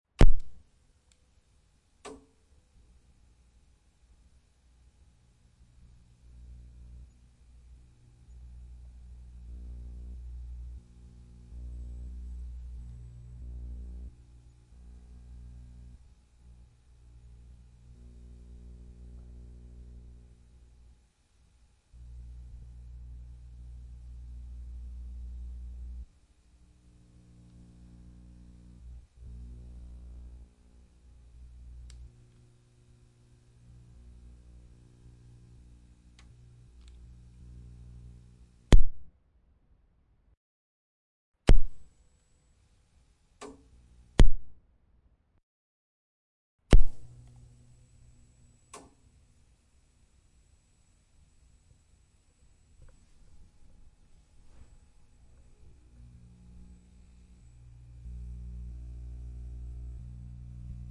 television tv on off CRT buzz close
recorded with Sony PCM-D50, Tascam DAP1 DAT with AT835 stereo mic, or Zoom H2